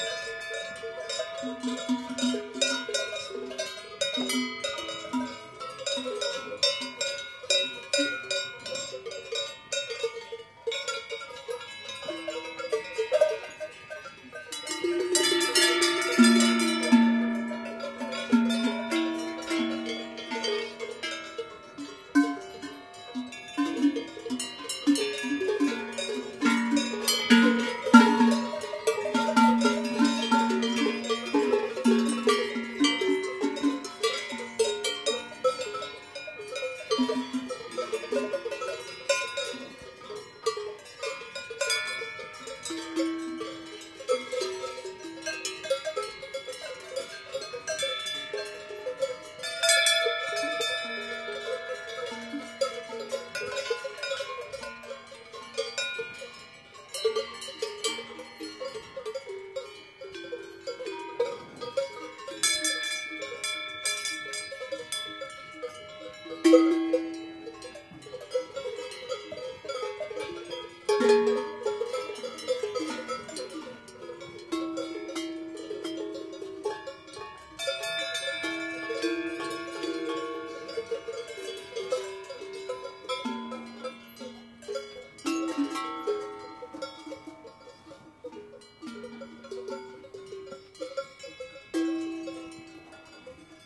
BreitenfeldCH120724 CA
Close-range atmo of the little swiss mountain village of Breitenfeld. The cows have come down from pasture and are standing at the gated bridge to the village, waiting to be let in to be milked.
Recorded with a Zoom H2 at 90° dispersion.
alpine, close-range